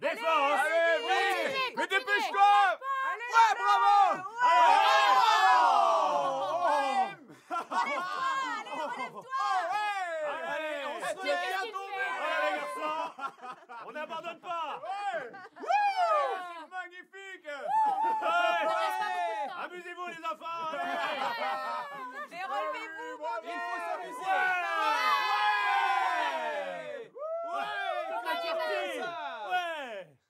Interior vocal (French) ambiences: crowd at a sporting event